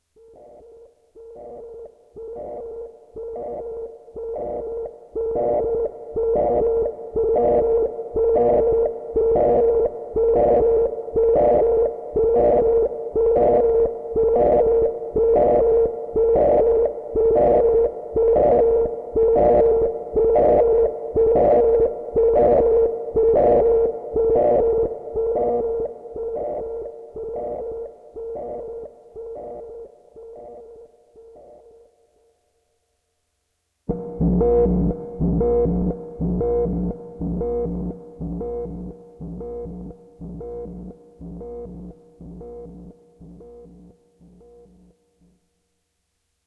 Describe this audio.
Scifi Microbrute 01
From series of scifi sounds. Arturia Microbrute analog synth through Roland SP-404SX.
arturia, scifi, siren, synthesizer, alert, microbrute, alarm